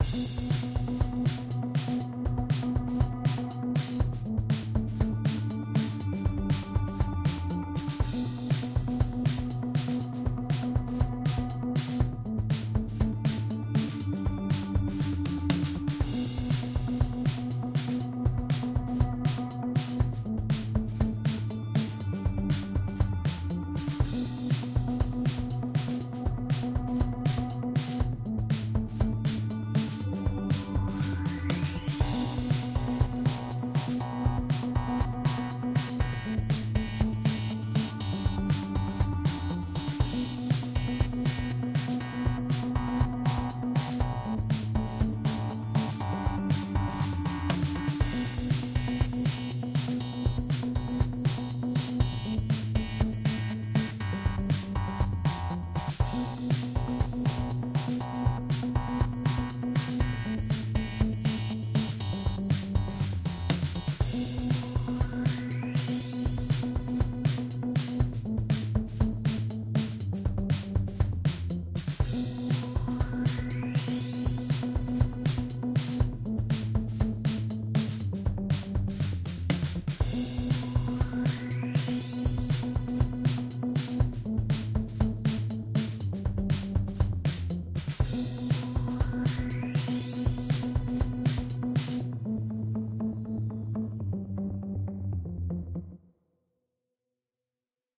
Constellation - Upbeat Spacey Song
A spacey upbeat song with a nice beat. Might be suitable for some sort of action filled part of a video or story. Maybe for a cinematic scene, or a part of a game like an intro or menu. Many possibilities. Enjoy!
beat, drums, fast-paced, rythm, space, spacey, techno, upbeat